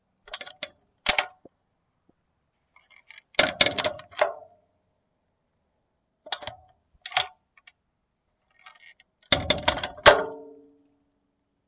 Open and Close an iron gate
Opening and closing an iron gate twice. Its the metal gate of a cemetry.
door, squeaky, gate, close, iron, metal, clang, open